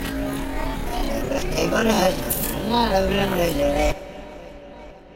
Recorded in Velez-Malaga Spain June 2005 using minidisc. The voice is that of a local Spanish market trader and has been heavily processed using delay, filter, and reverb effects.